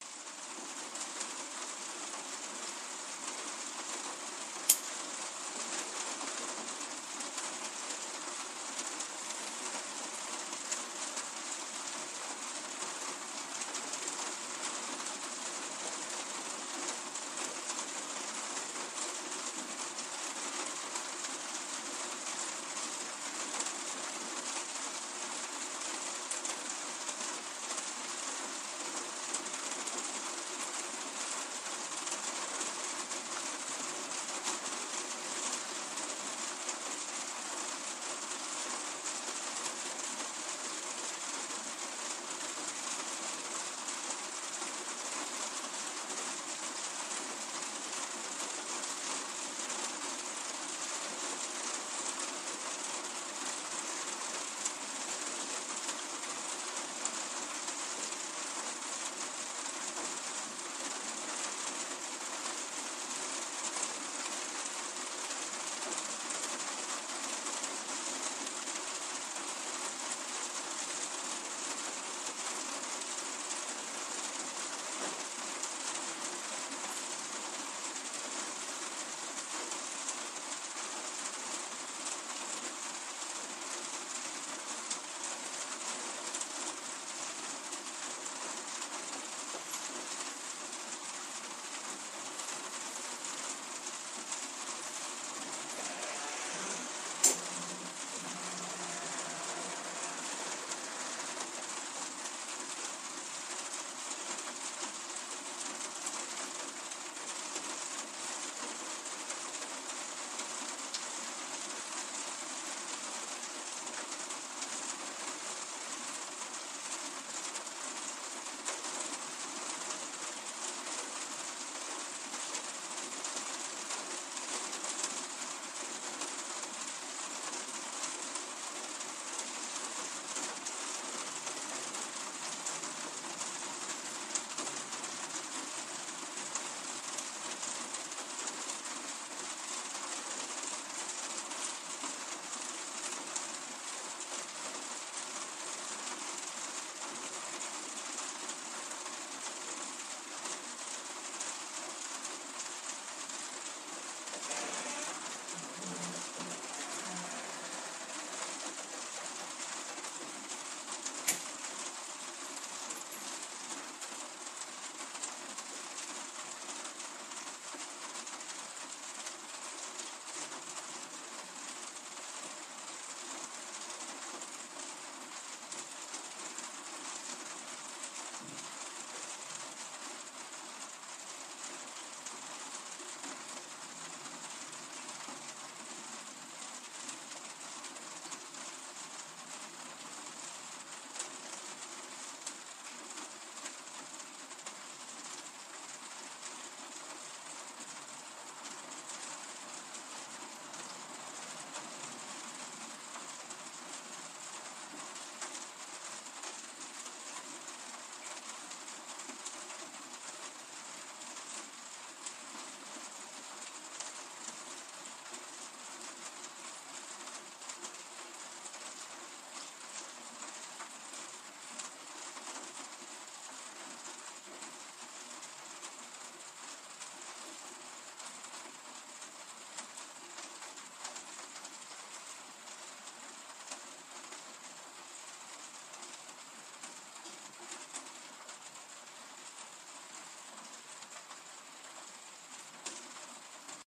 Raindrops falling on the polycarbonate roofing of my balcony just before sunrise.
Nature, Rain, Sydney, Raindrops, Australia, Smoke, Door, Water, Lighter, Creak